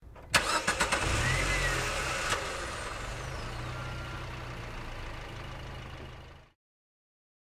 turn On Car
turning on a car